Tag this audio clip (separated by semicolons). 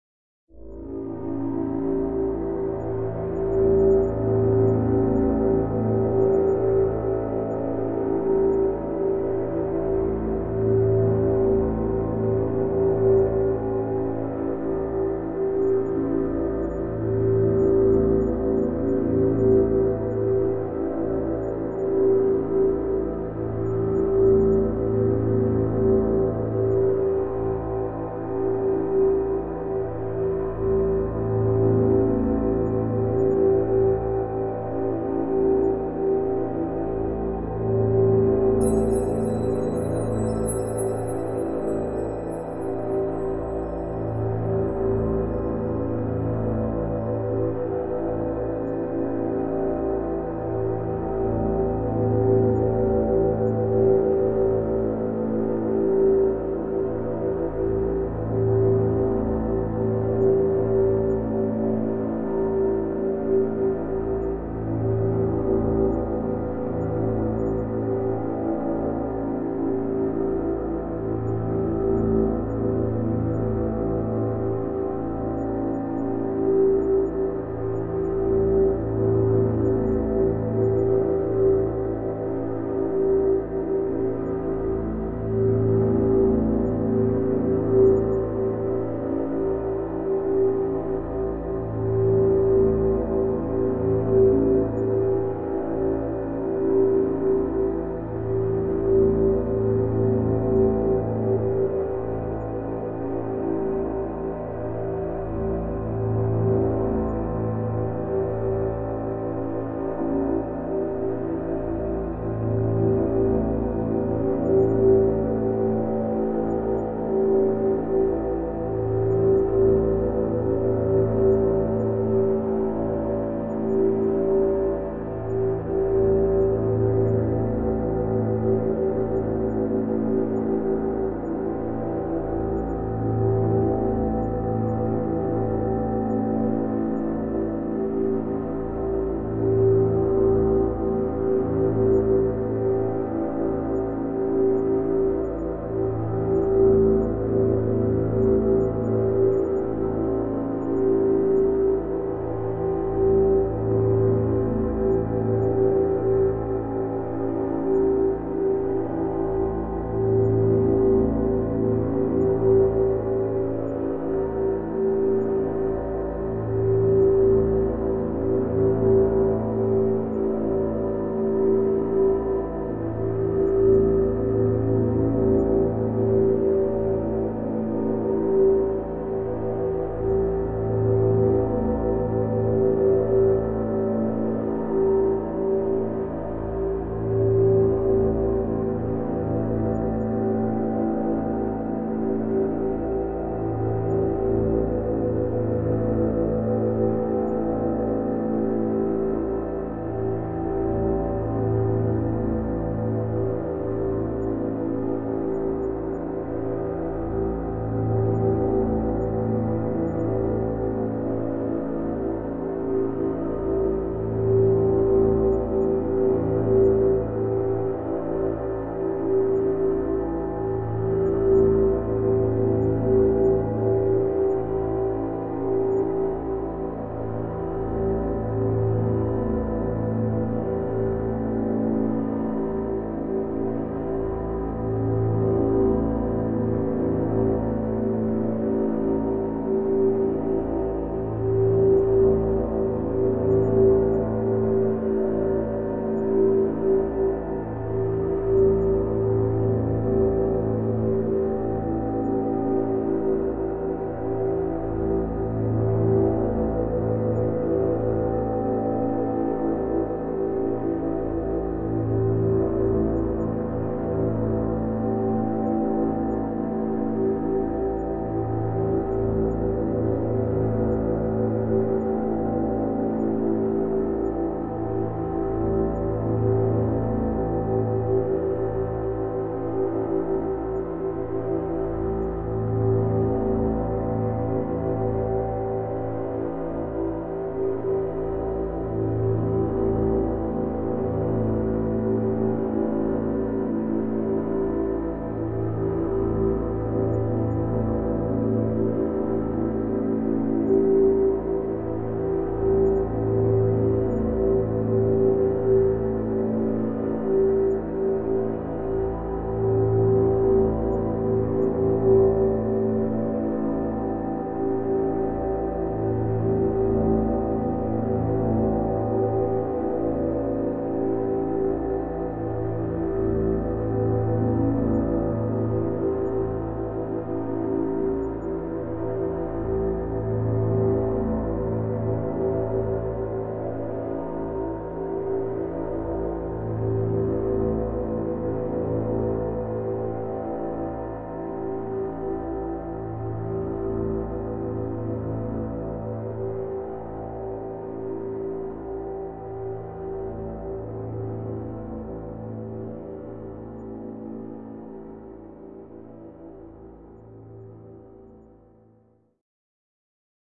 synth; terror; thrill